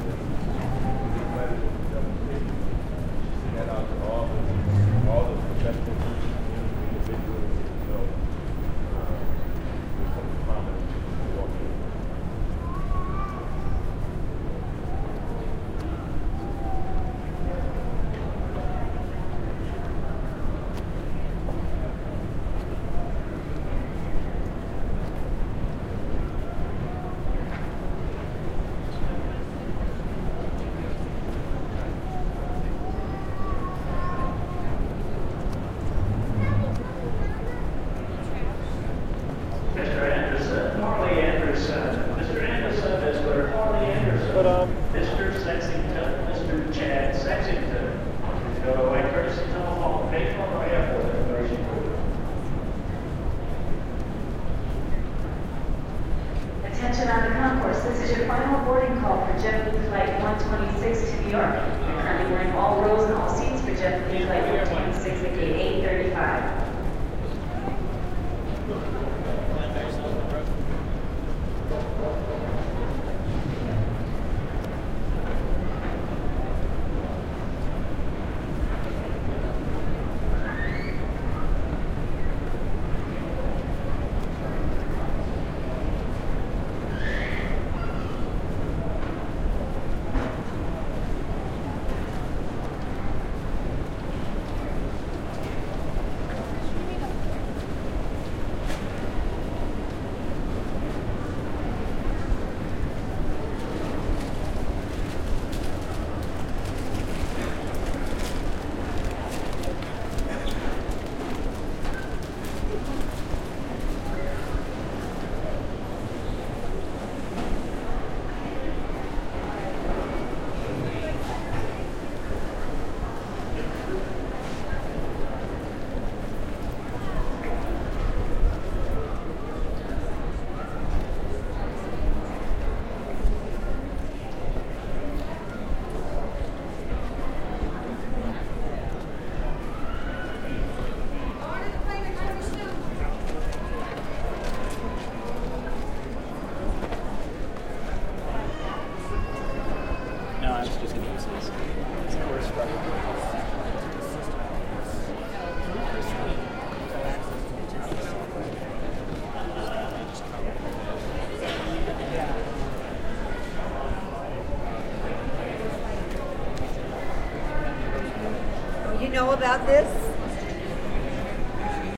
019 denver airport
Walking through the Denver airport, various announcements can be heard.Recorded with Zoom H4 on-board mics.
field-recording, announcement, airport, walking